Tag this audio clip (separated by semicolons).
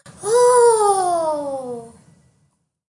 action; dark; Mystery; voice